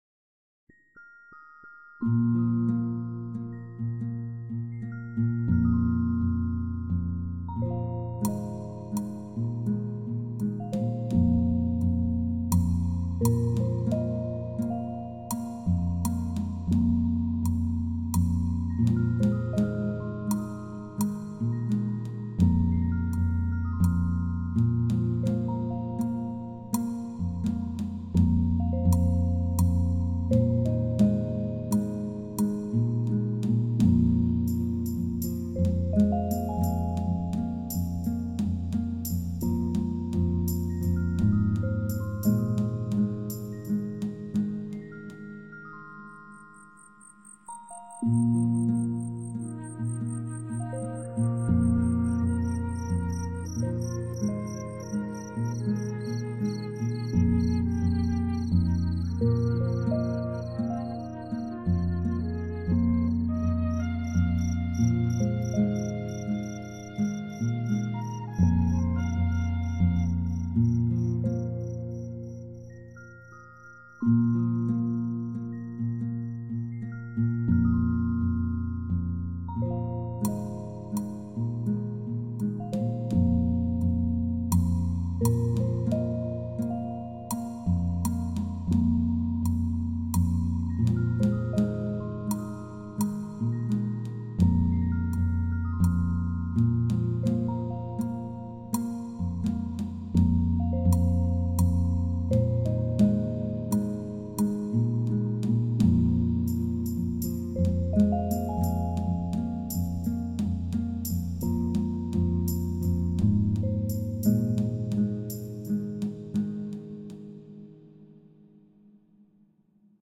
Ethno Ambience
Ethno Ambient Music Background
music, ethno, ambient, atmosphere